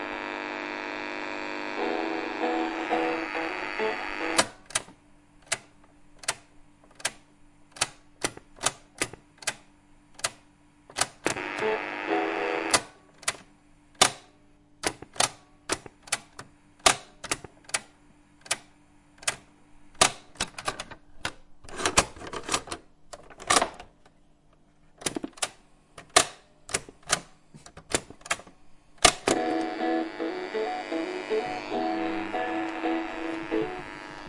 Recording of Amstrad tape player being started and stopped, play and pause buttons being used.